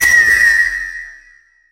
Rikochet V2 Medium 2
Bang, Gunshot, Loud, Pew